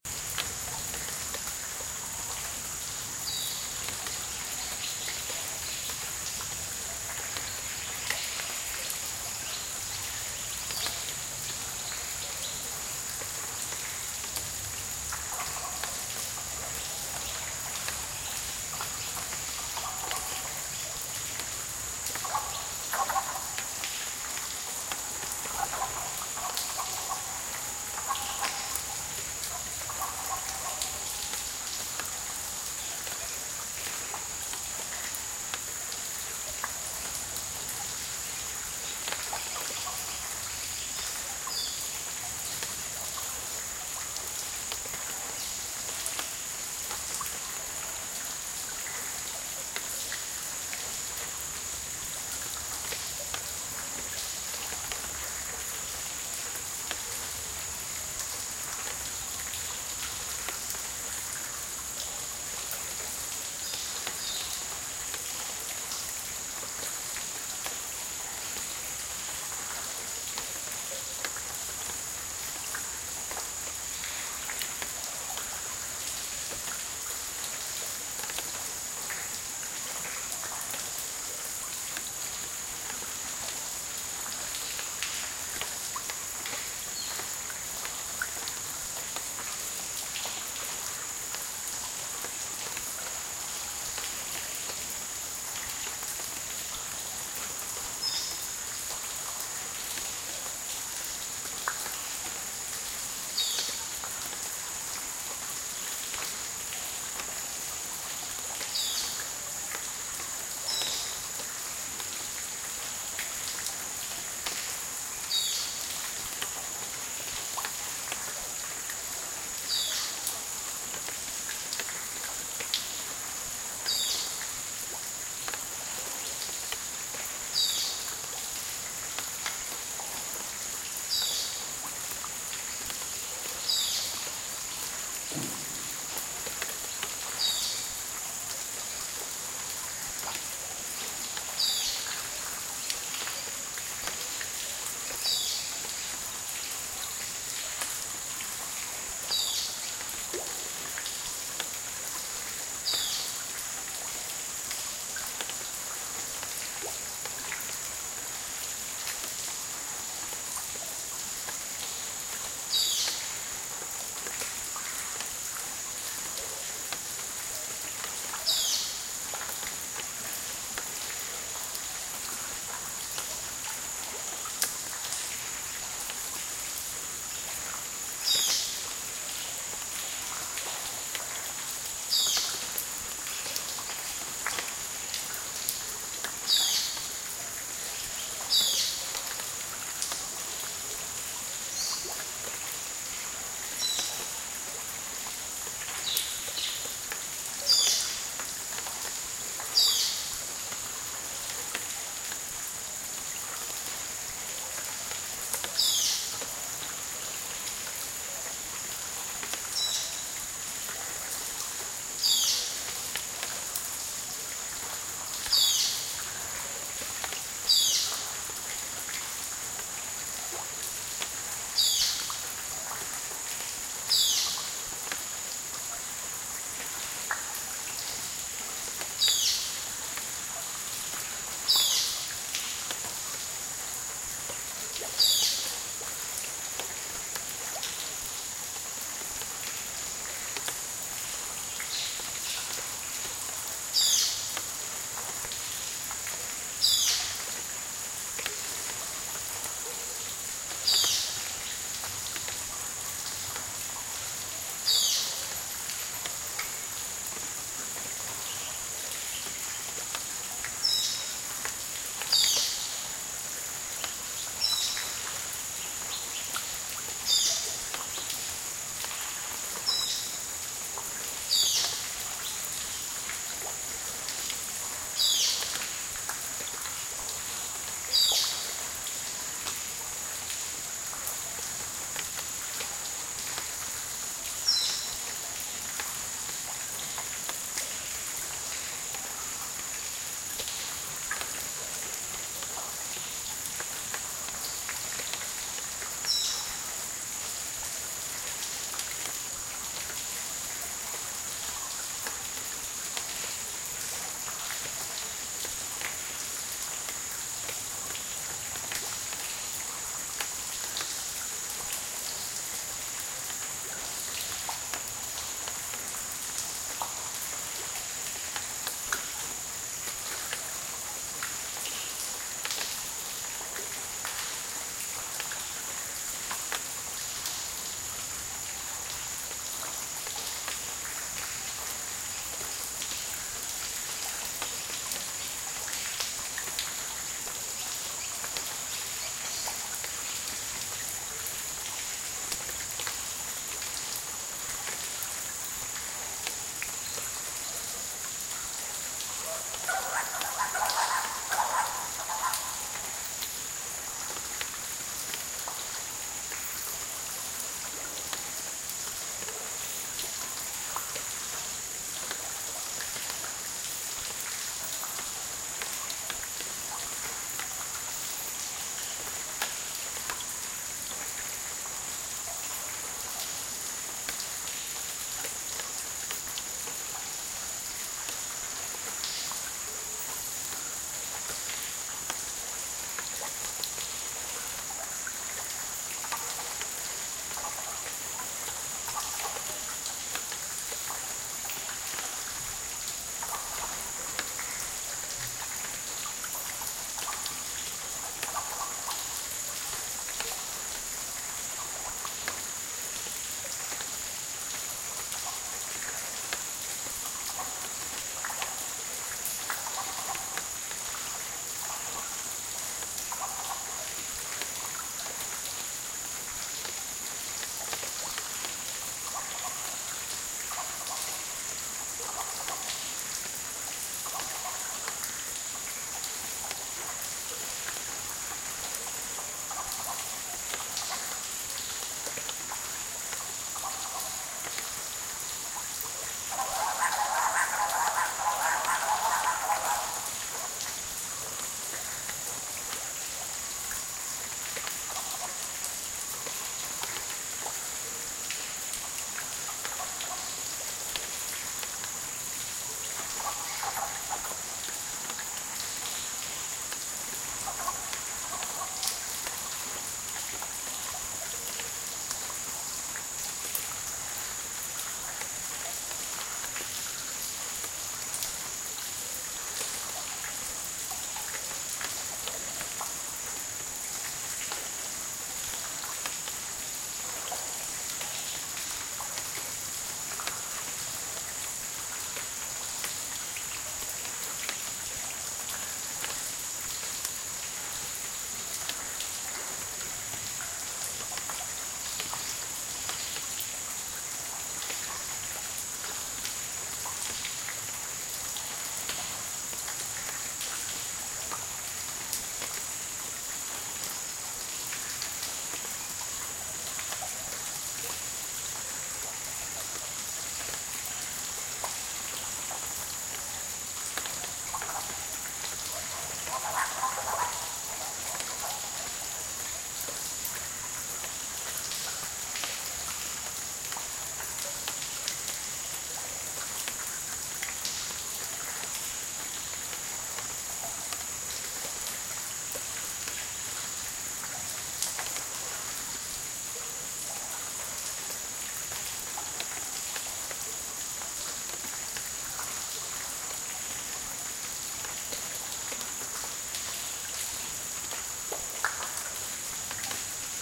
Sound of a cenote after a heavy rain.